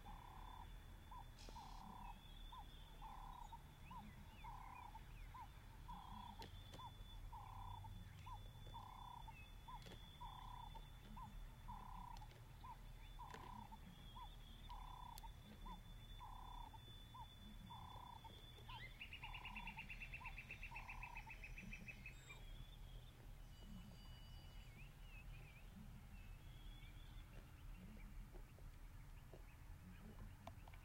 African Bush
Recorded at Malelane in The Kruger National Park.
Field-recording, Malelane